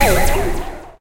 STAB 010 mastered 16 bit from pack 02
An electronic effect composed of different frequencies. Difficult to
describe, but perfectly suitable for a drum kit created on Mars, or
Pluto. Created with Metaphysical Function from Native
Instruments. Further edited using Cubase SX and mastered using Wavelab.
effect, electronic, industrial, spacey